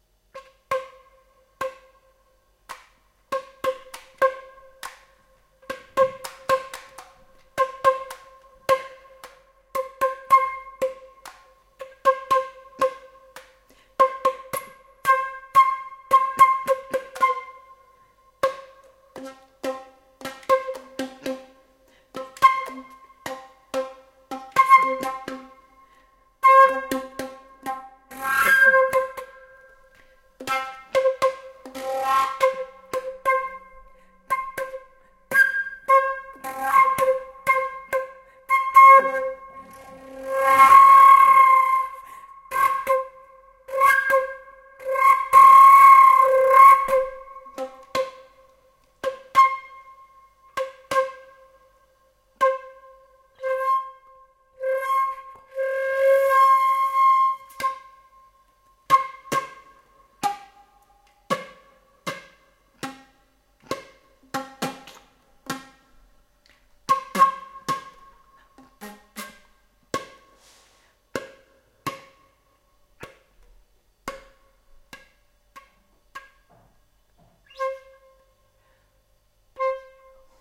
Flute Play C - 17
Recording of a Flute improvising with the note C
Acoustic, Flute